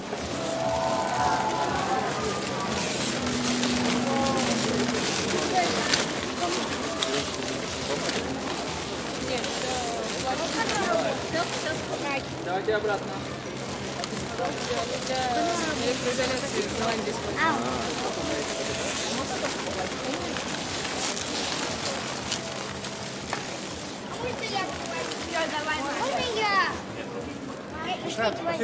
Walking bamboo mechanism, unusual abstract sound
Recorded on Zoom H4n. It was real walking mechanism, made of bamboo.